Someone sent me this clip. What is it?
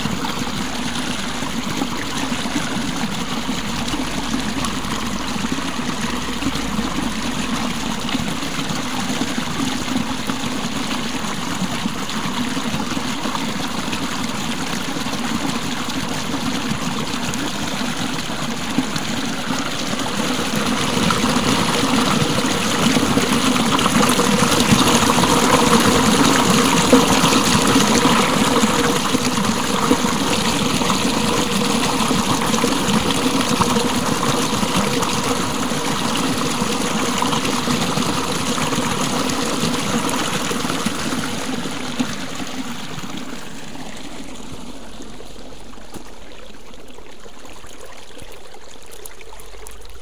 Approaching to a small irrigation channel, so-called “levada”, on the isle of Madeira in the Atlantic Ocean. Sound of flowing water. Sony Dat-recorder. Vivanco EM35.